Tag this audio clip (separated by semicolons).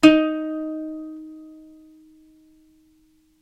sample; ukulele